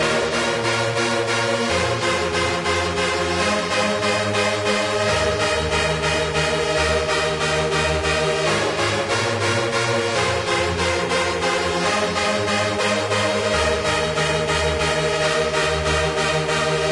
This is a remake of my favourite song.i tried to make it sound like the original, but i added some effects made by my own.I used FL-Studio 6 XXL to get this sample done.This is a modified version of my Trance Trumpet set.This sample works good as a loop.You can Fade in/out this sample as needed.
loop, trance, 142bpm, techno, melodie, dance